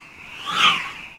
A stereo recording of a whisk tied to a cord and swung in front of the mics. Rode NT-4 > Fel battery pre-amp > Zoom H2 line-in.
dry, stereo, swish, swoosh, whish, whisk, whoosh, xy